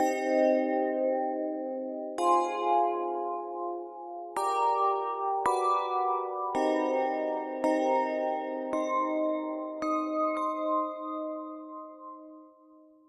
A chord progression in C minor made on LMMS, played on bells put through phasing and delay. If you make music using this, please leave a link under this post.
bells, reverb, ringing, echo